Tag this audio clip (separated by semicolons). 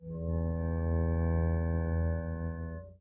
experimental; string; note; guitar; bowed; electric; real